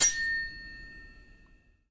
struck tablespoon 1
struck the back side of a metal tablespoon with another spoon, and let it ring.